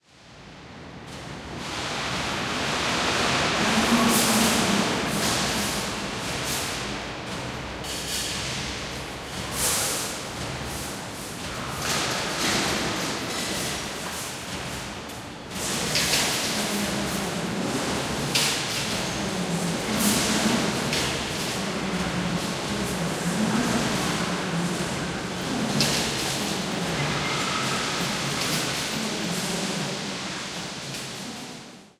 steel factory009
Unprocessed stereo recording in a steel factory.
industrial, noise